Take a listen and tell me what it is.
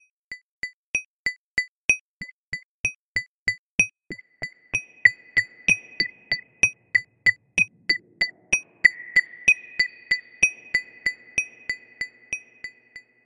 RACCA Guillaume 2019 2020 tick
This song has been created from generating rythmth track in Audacity. I set the tempo at 190bpm, 3 beats ber par for 10 bars. I took the long drip for the beat sound. Then i duplicated the track once and used the time shift tool for moving my second track. I used the wahwah effect on both and I duplicated one last time the second track. I added reverb on the third track and moving again with the time shift tool. It gets delay between each track.
drip, fast, water, drop, crystal, reverberation